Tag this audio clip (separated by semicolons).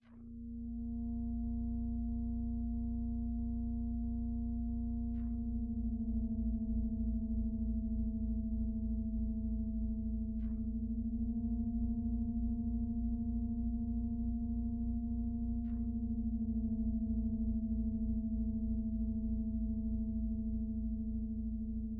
creepy; dramatic; drone; high-pitched; synth